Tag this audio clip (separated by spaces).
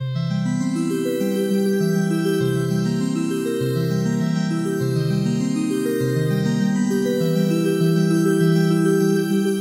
pixel wave